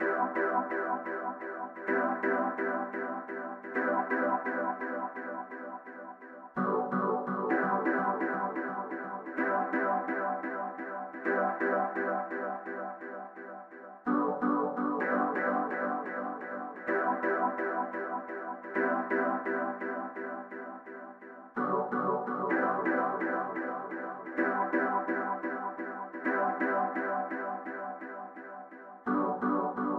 Jazzy chords that could be used in house, techno, etc.